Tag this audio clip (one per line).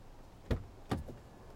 car
sounds